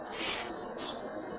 6-20-2006 after eight2
faint whispering captured on a digital Sony IC Recorder in my empty bedroom. recordings follow a series of bizarre nights which my girlfriend and i experienced in our home.
anomaly, evp, voice, whisper